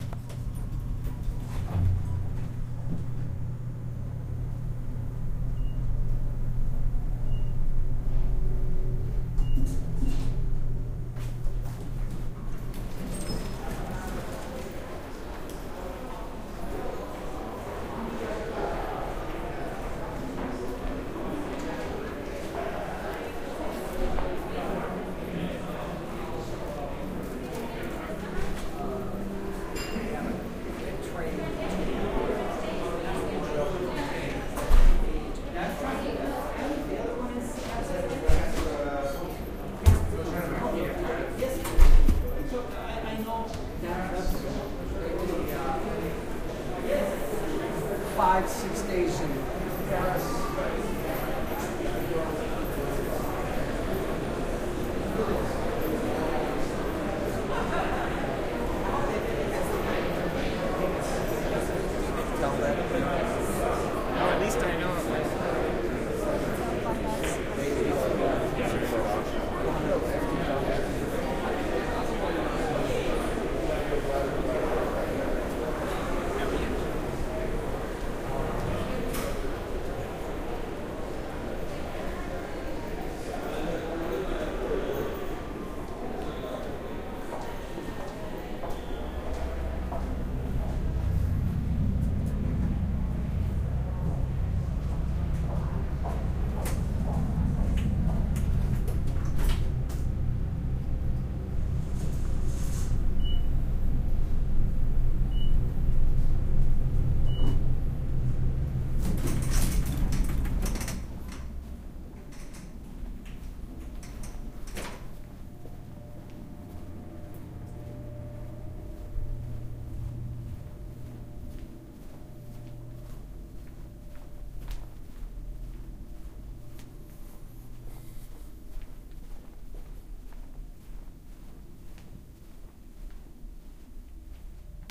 LAX Hilton Lobby
Begins with an elevator ride to the first floor lobby of the LAX Airport Hilton. Recorded with an Edirol-R9.
hotel-lobby, field-recording